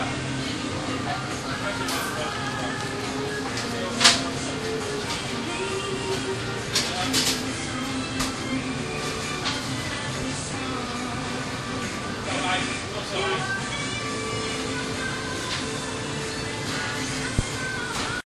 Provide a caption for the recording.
washington insidefoodstand
I stuck the DS-40 in the window of a food concession stand on the National Mall in Washington DC recorded with DS-40 and edited in Wavosaur. They had $4.50 hot dogs... what a joy it is to over pay for food.
road-trip, vacation, summer, travel, washington-dc, field-recording